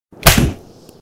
This sound is for a biomechanical tank in a game that shoots out acid at targets you can use it for whatever you want.